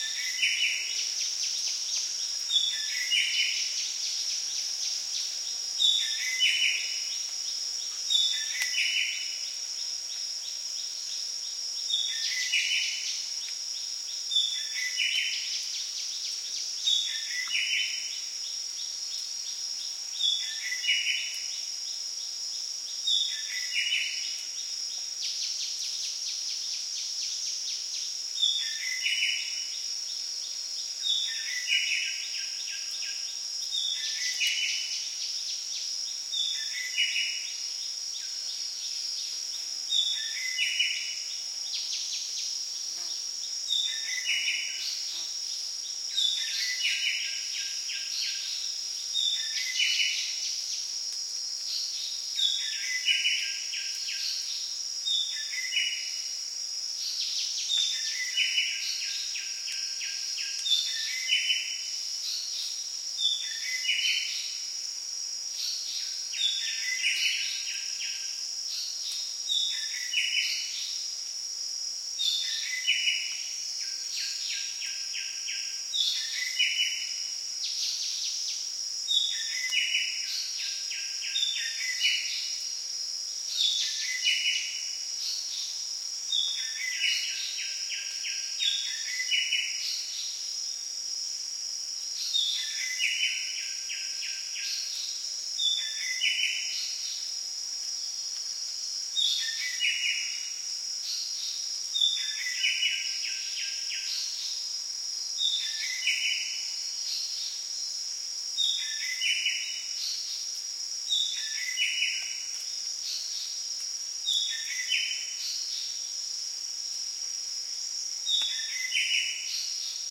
Thailand jungle day birds crickets insects echo cleaned
Thailand, insects, crickets, field-recording, day, jungle, birds